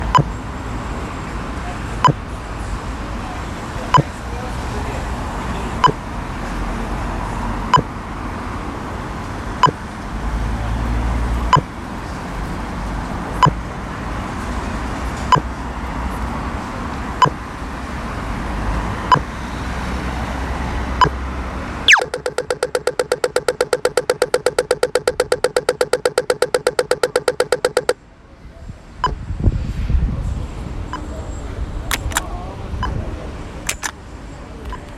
The sound of a pelican crossing in Dublin, beeping to let the user know to wait to cross, then making a noise and clicking to let the user know the road is safe to cross. The button is then pressed a couple of times at the end. Some traffic noise in the background.